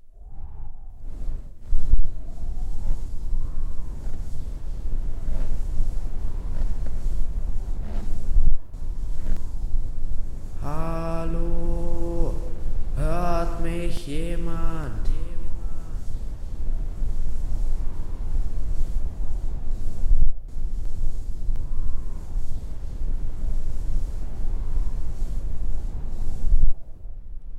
This sound displays a lost man on a mountain. All sounds are recorded with a T-bone SC-1100 microphone.I used the open source programm "Audacity" to mix and edit the recorded sounds. Sounds: Walking in snow sound: This sound was produced by pushing and pulling an old cushion. This sound has been after effected with a tuner so the sound got deeper.
Voice: My voice with hall and echo
Wind: The wind has been produced with two sheets of paper. I rubbed them on ther surface and edited this sound later on with a trebble boost and echo effects. I produced the Sound on Fryday the 26 of September in 2014 at 21:58:20 o'clock